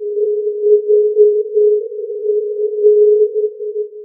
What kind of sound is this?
Funny Sine
Weird sine-wave thingy
abstract, alien, effect, future, fx, pulsing, sci-fi, sfx, sine, sound, sound-effect, soundeffect, strange, weird